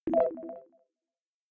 Synth ui interface click button positive
Synth, interface, button, ui, click, positive